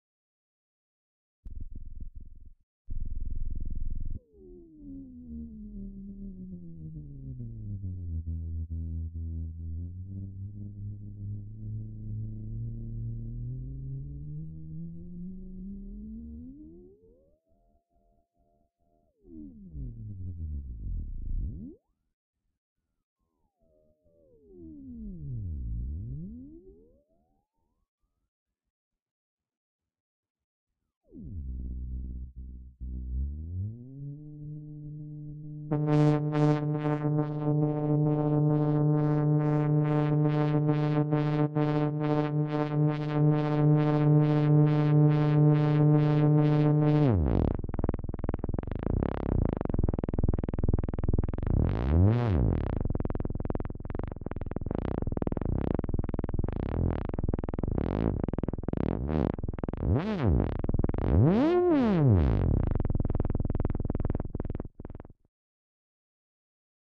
Wobbly synthetic effect
A synthesized wobbly effect sound. Can be useful as an effect for various media productions.